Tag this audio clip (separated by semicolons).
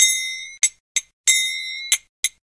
bhajan; cymbals; ethnic; india; karatalas; kirtan; world